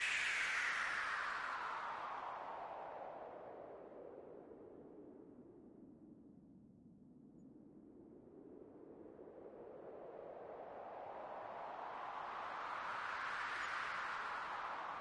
FX Noise house falling 1 128
Falling effect frequently used in electro house genre.